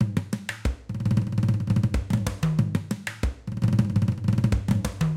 congas, ethnic drums, grooves